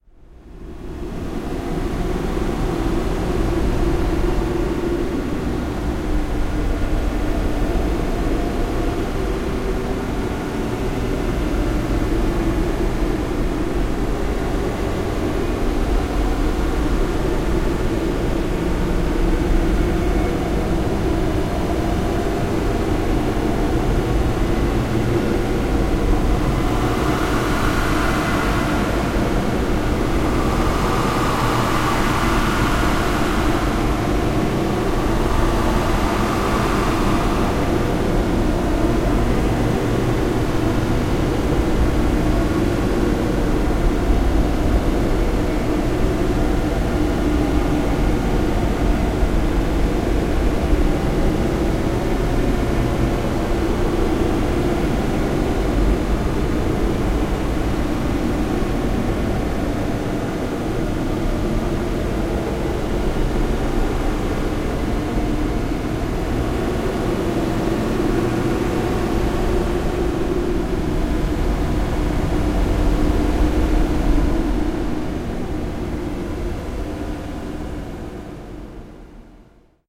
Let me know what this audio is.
Inertia, Woods, Crows.
Crows, Inertia, Woods